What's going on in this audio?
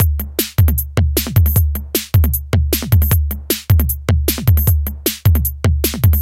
TR-606 (Modified) - Series 1 - Beat 06
Beats recorded from my modified Roland TR-606 analog drummachine
Electronic, Analog, Drum, Beats